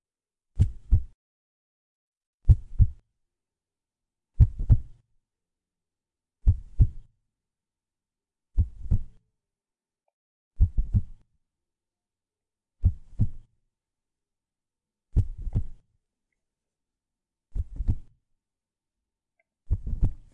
Heartbeats latidos corazon
2 times heart beat
2 latidos de corazón
foley
corazon, foley, hear, heart, heart-beat, heartbeat, latidos, pulse